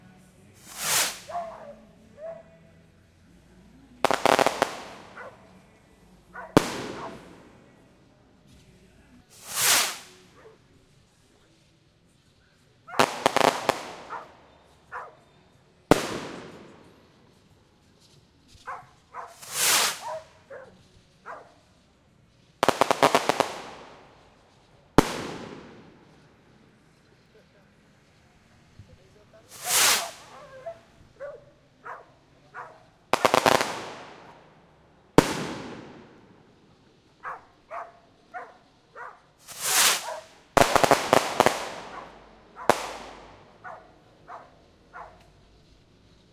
fireworks firecrackers close nice fizzle and pops various with dog barking
close, firecrackers, fireworks, pop, fizzle